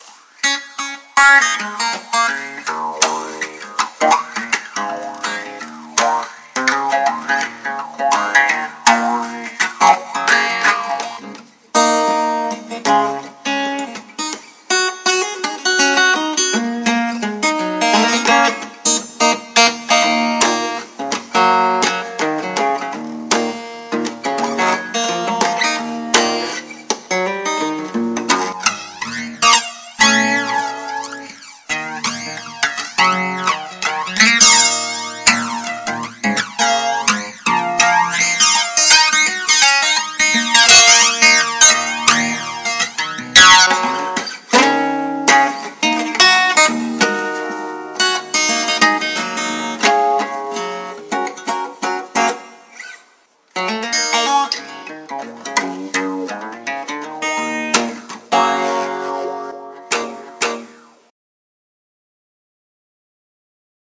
Acoustic direct to Smart-Phone device, adding second line in edit on Sony Vegas 15.0 (Movie Magix), with minor 'wah' or 'flange' on parts.
finger, ambient, guitar, clean, frialing, picking